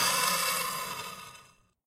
One of a series of sounds recorded in the observatory on the isle of Erraid